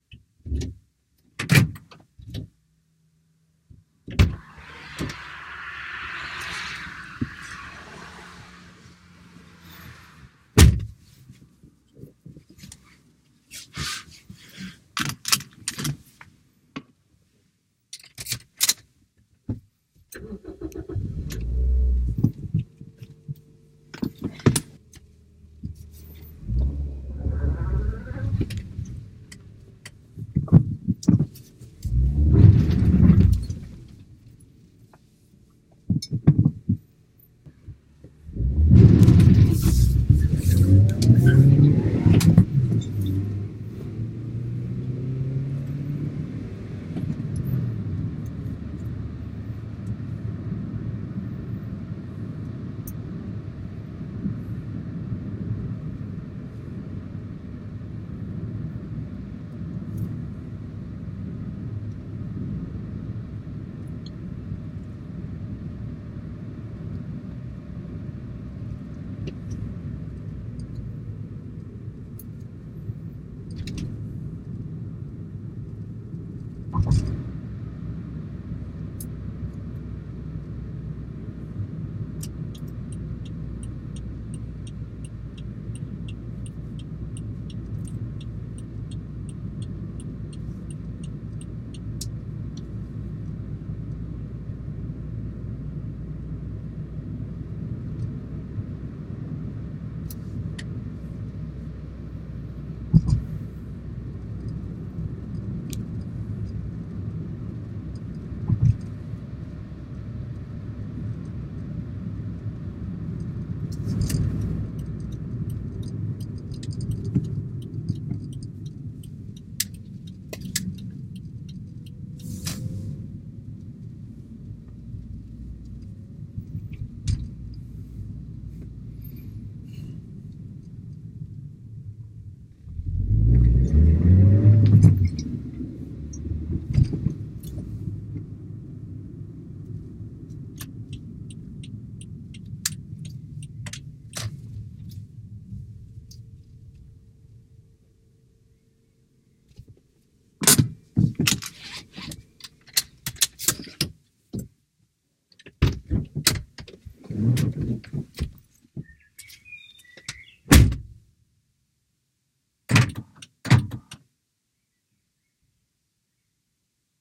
car: driving a car, complete scene
Driving a car: complete secene from getting into the car to parking the car.
automobile, car, drive, driving, motor, traffic, vehicle